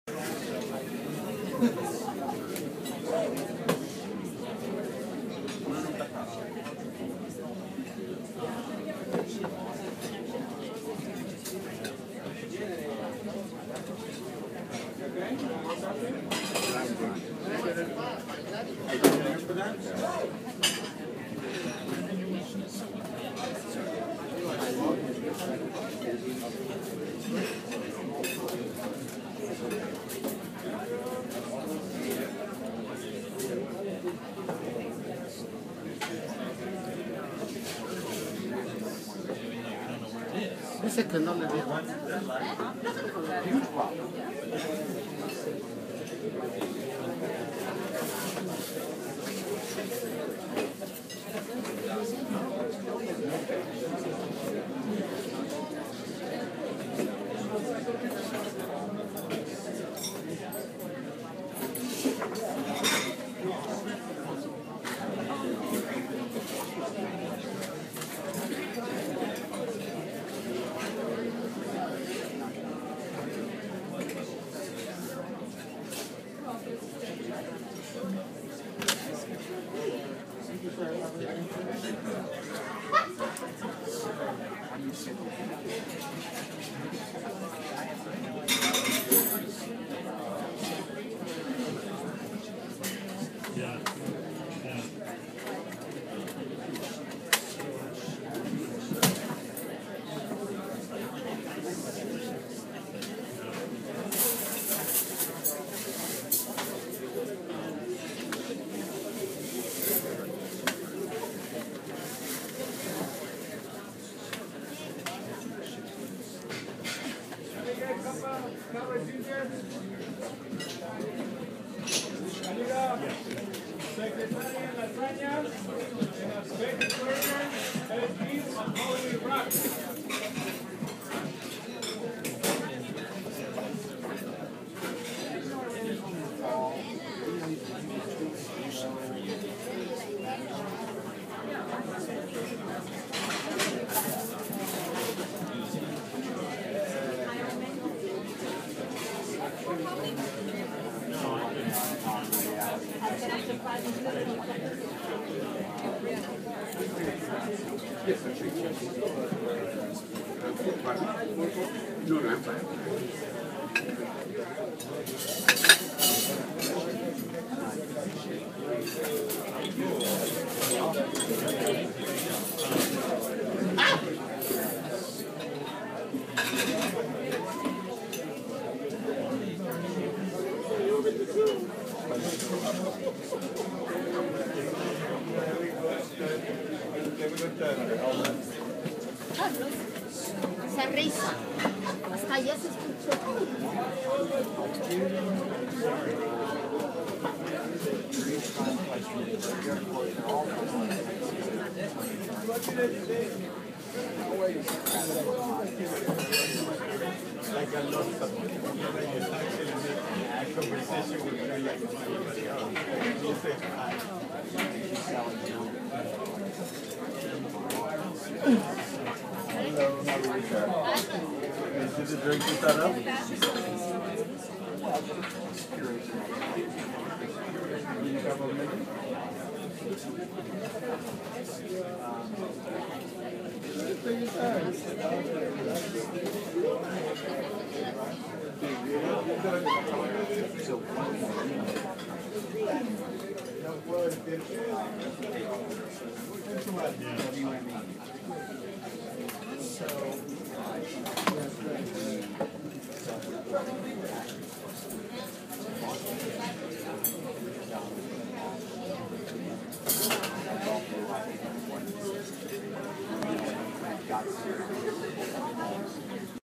This is 4 and a half minutes of background noise from a New York City diner, including people talking, clanging plates and glasses and forks and knives and cups being cleared away by staff. Crucially, no music is playing. This means you can either use this clean, or layer in your own rights-cleared music if you're using this in a film or whatever.
Recorded in 2016 with an iPhone — don't let the recording device scare you, it sounds pretty good and certainly fine for this kind of background noise. Use it in any way you like for any purpose.
Warmly,